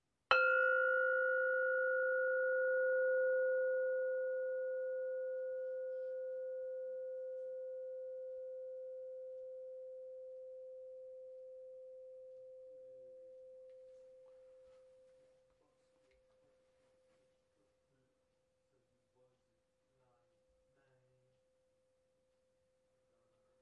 Pot Lid Resonating
Vintage Pot lid tone, struck by a mallet
Pot, Pan, Ding, Ring, household, Bell, Resonate